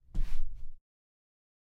touching the skin with furniture